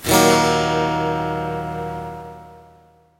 Found original file of "guitar 0", minus the effects.